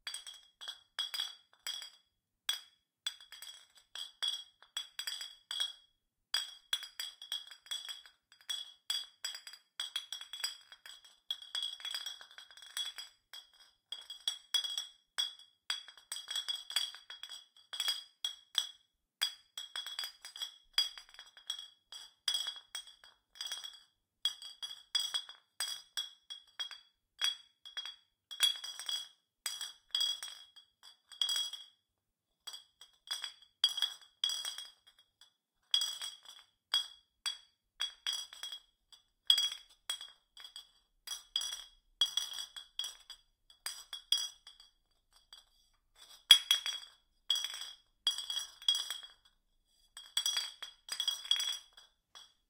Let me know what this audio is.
Four beer bottles clinking together to emulate the sound of milk bottles clinking in a rack. Recorded for a production of Our Town.
Recorded using Audio Technica AT2020. Room noise removed with Audacity.